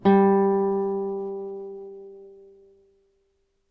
1 octave g, on a nylon strung guitar. belongs to samplepack "Notes on nylon guitar".
g, guitar, music, note, notes, nylon, string, strings, tone